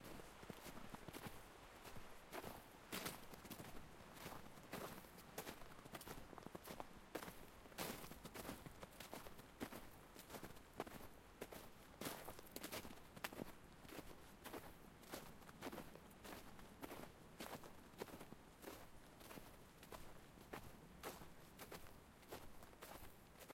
Footsteps Snow 09
Recorded footsteps in the snow using a Zoom H2N and X/Y pickup pattern.
walk, snow, footsteps, outdoor, walking, field-recording, feet